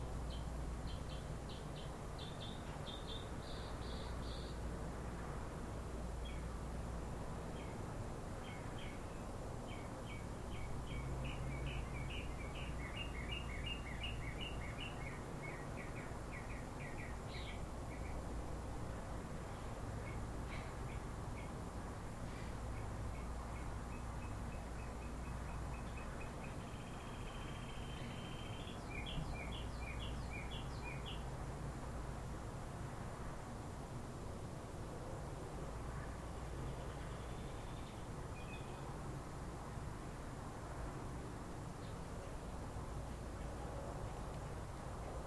Out on the patio recording with a laptop and USB microphone. Another bird or possibly the same bird. One has been singing at night for some stupid reason outside my window, if I can keep from evicting him with my bb gun, I'll try and record it soon. This is not him.
atmosphere, bird, field-recording, outdoor, patio